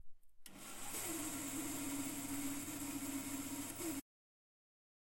MACHINE-BAND SAW-Turning band saw on, running, model Record Power BS250-0001
Pack of power tools recorded in carpenter's workshop in Savijärvi, Tavastia Proper. Zoom H4n.